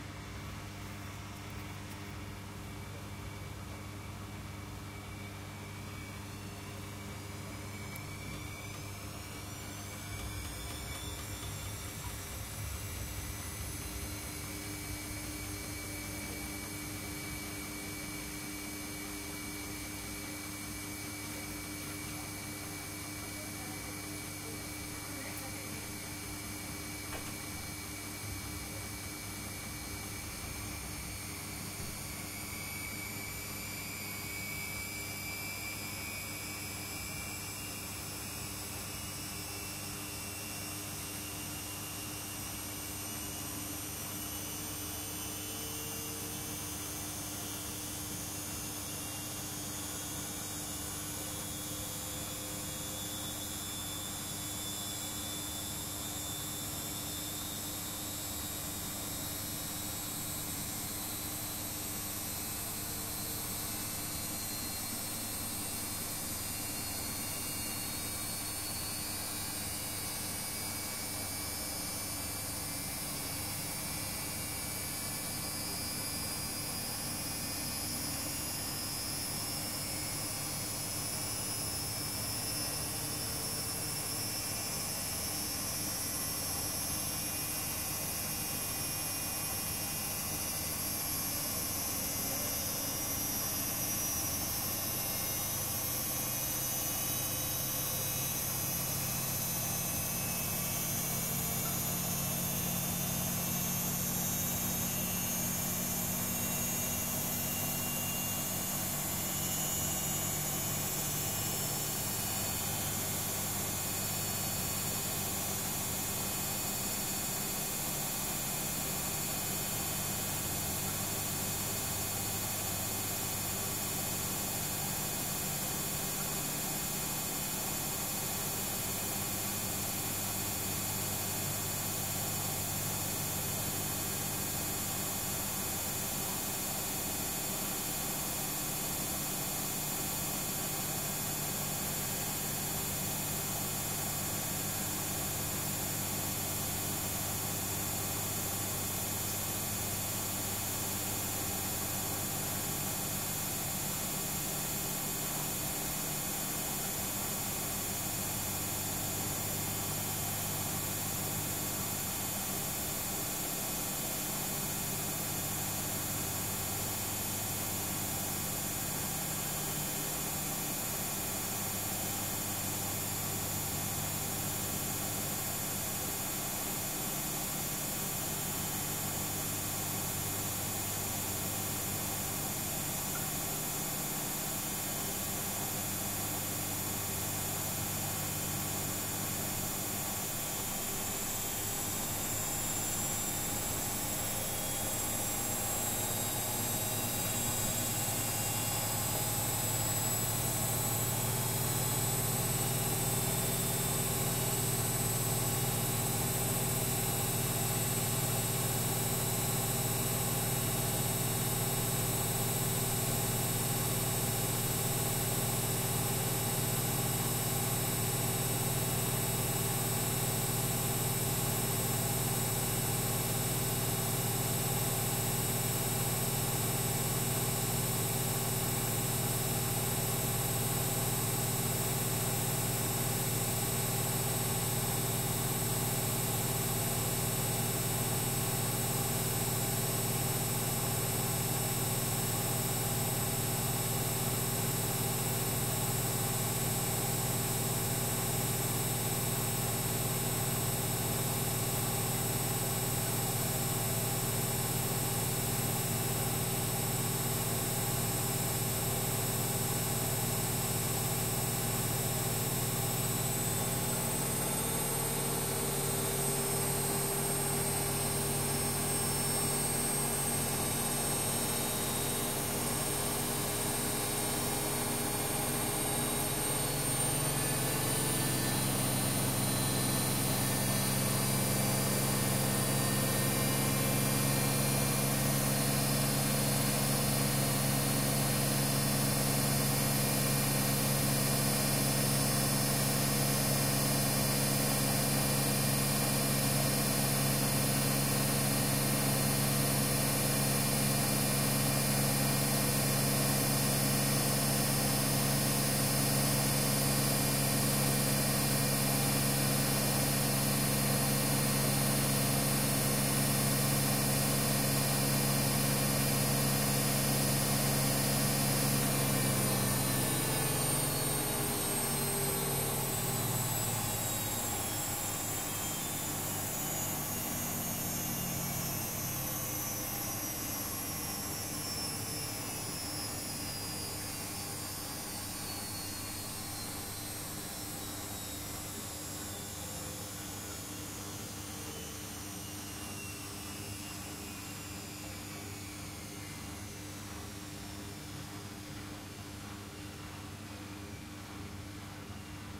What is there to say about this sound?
washing machine (laundry machine) centrifugation
Stereo recording of a Siemens Clothes washer doing it's centrifugation.
Washing, laundry, machine, washer, centrifugation, clothes